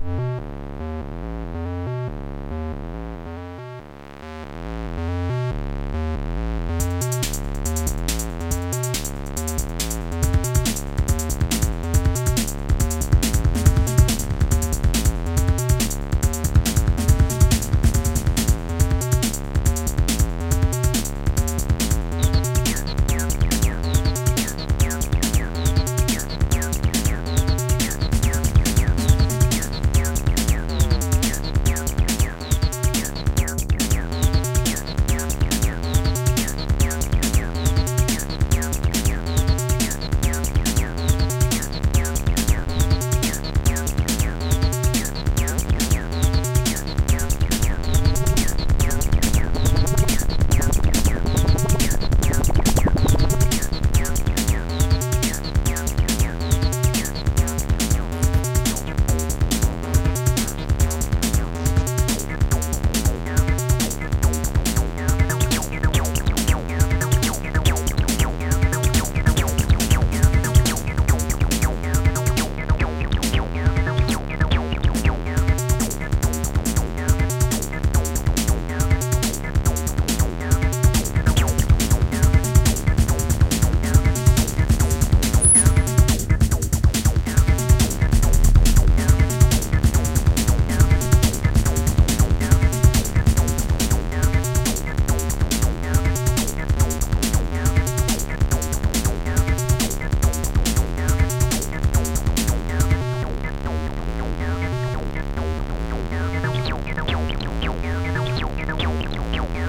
silly acid track I made with ms20, tr606, mc505 and x0xb0x